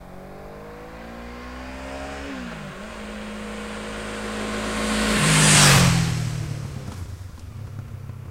Motorcycle passing by (Honda CBF500) 1
field-recording, honda-cbf500, moto, motorcycle, stereo, tascam